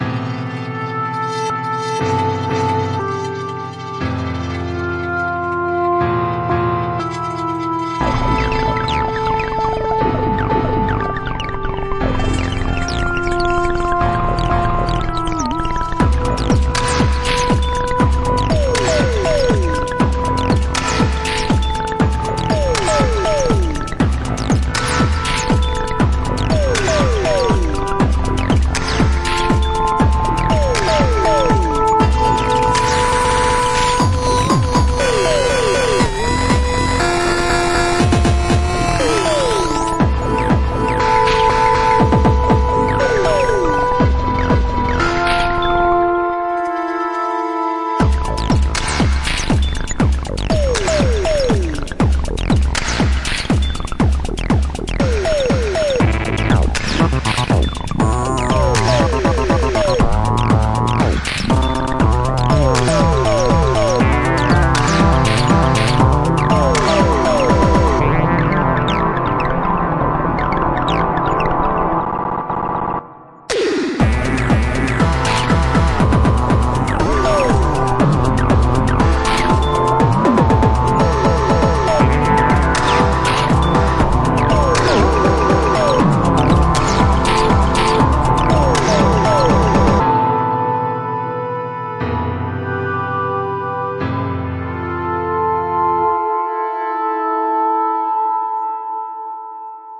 Dystopian Future Electronic Beat Glitch Synthesizer Acid